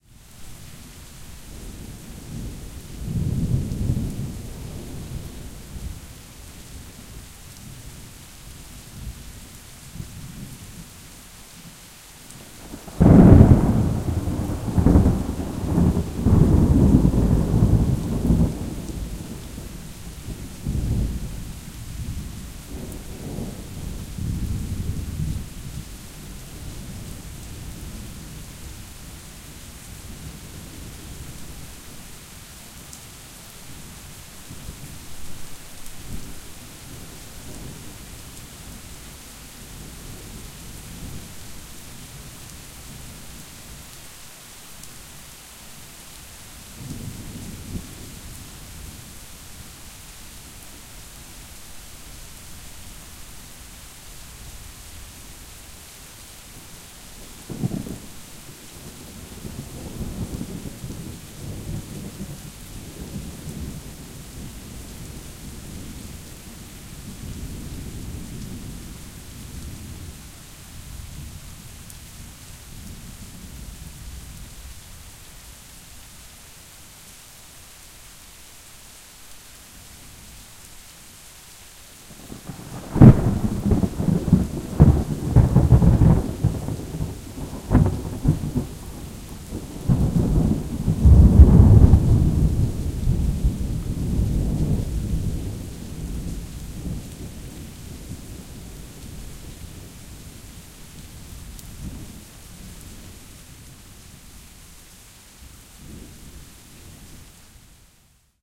Rode NT4 placed centered and perpendicular to an outside window, fed into an maudio Delta1010LT. Slight clipping on the larger thunder claps, had the input slightly too high. This is a sample of about an hours worth of recordings I got on this storm. Heavy rain and the lightning got so bad later I had to shut off my computer. 3AM couldnt sleep.
rain, thunder, thunderstorm, clipping